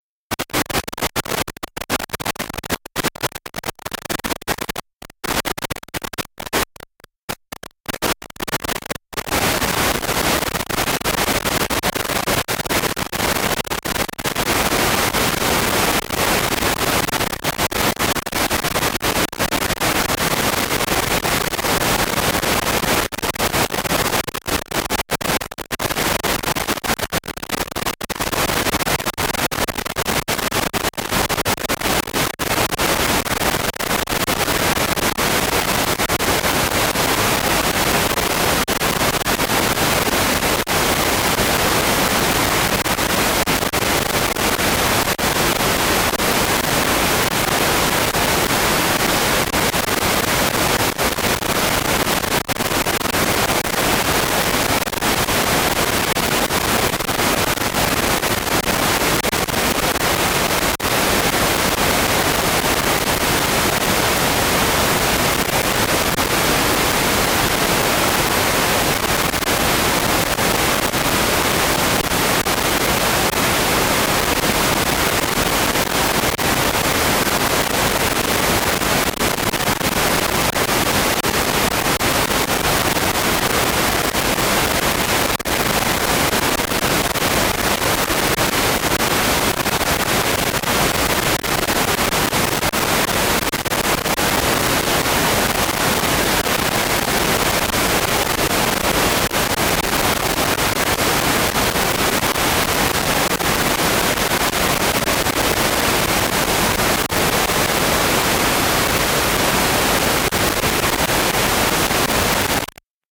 Intermittent radio interference
interference, noise, radio, broadcast, communication, violation